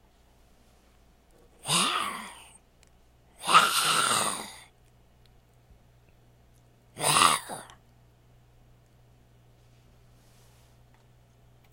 Recordings of me making some zombie sounds.